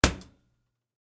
Paper Shredder Door Slam Slight Ring 1
Close, Metal